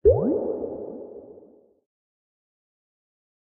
Bending a bell synth in pitch.
effect, rain, sound, Water